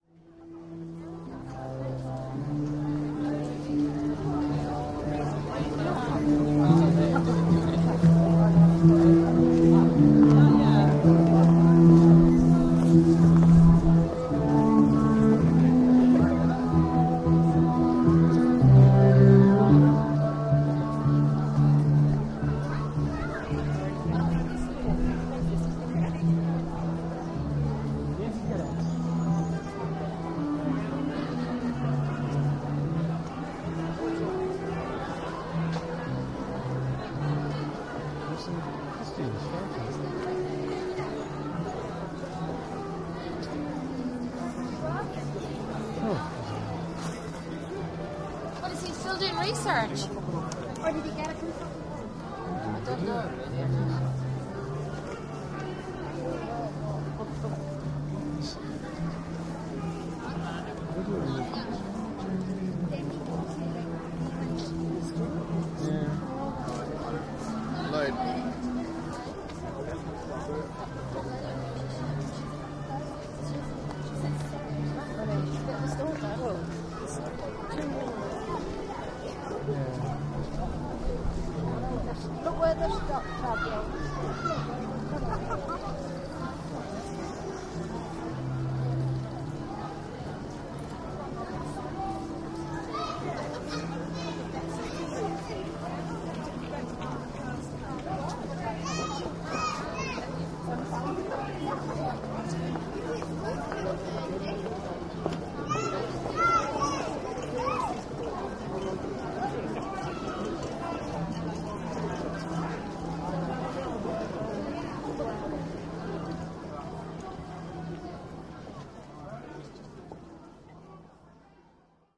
street scene 1
Out and about in York, UK, with a minidisc recorder at a street market. The city is famous for it's markets and diverse buskers performing a wide range of styles. Recorded Nov 8th 2008.